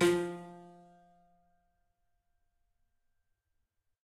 Tiny little piano bits of piano recordings